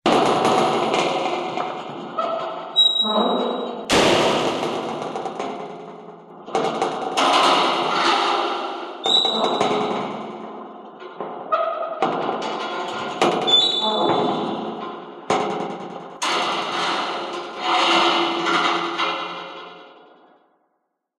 Element Earth
Stereo recording of a swinging, rusty gate. Recorded on an electret microphone.Has been processed with short stereo echoes